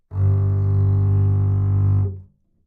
Double Bass - G1
Part of the Good-sounds dataset of monophonic instrumental sounds.
instrument::double bass
note::G
octave::1
midi note::31
good-sounds-id::8596